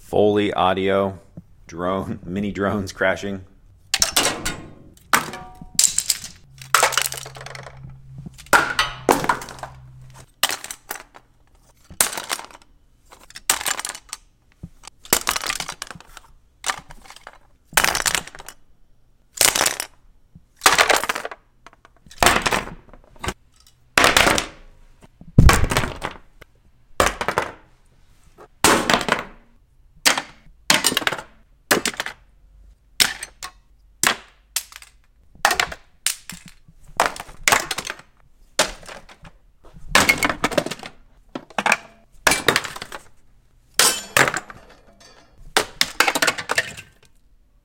Small Plastic Break Sounds SFX
Small plastic part crashing into misc. objects around workshop and breaking. Good for small plastic items being broken.